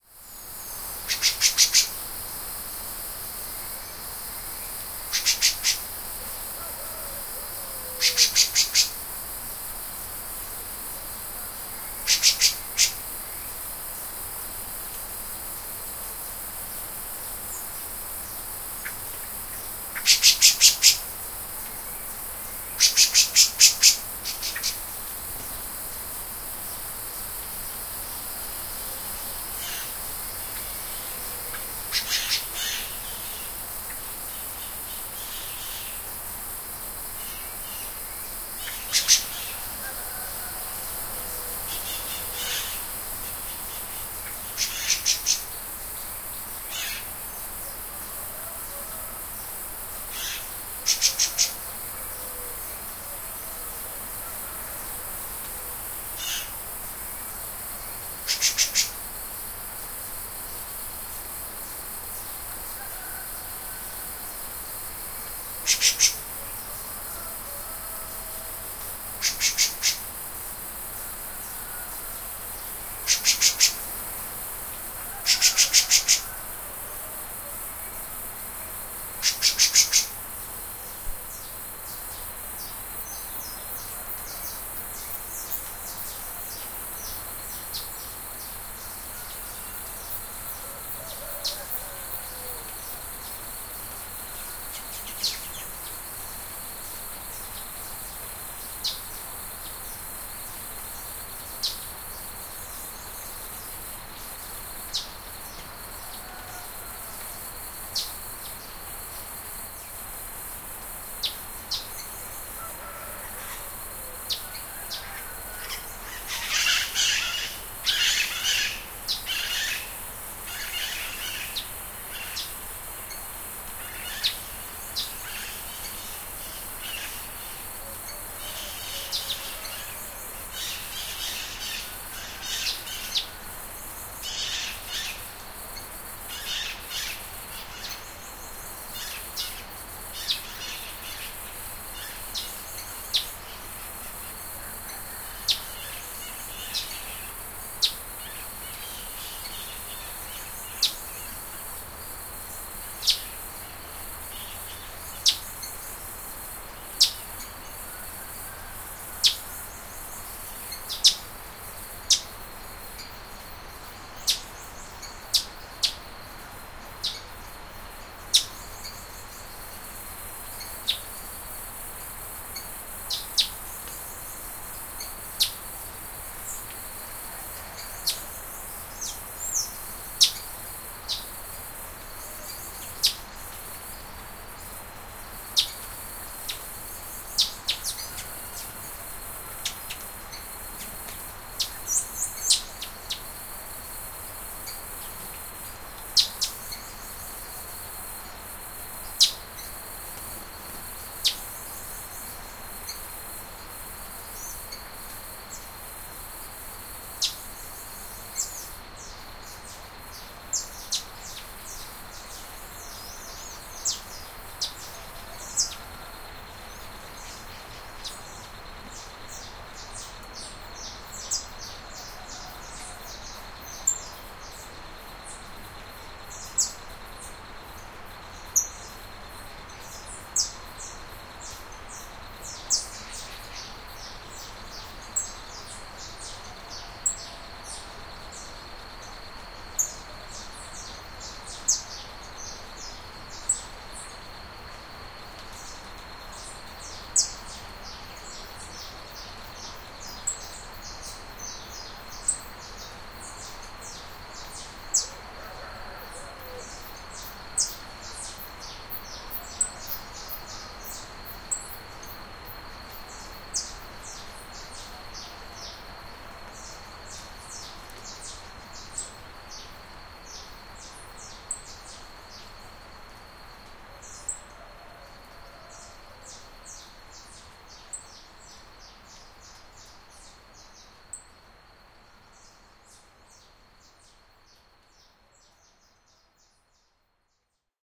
Early morning with parrots and other birds
belo-horizonte, bird, birds, brasil, brazil, cachoeiras, countryside, field-recording, forest, minas-gerais, morning, nature, parrots, rio-acima, rural, tangara